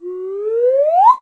FX swanee whistle up
ACME swanee whistle single slide up. Recorded in stereo with a Zoom H4n Pro.
slide, swanee, whistle